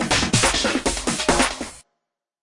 140bpm Stratovexst New BasSie
140bpm, remix, vexst, thing, mix, new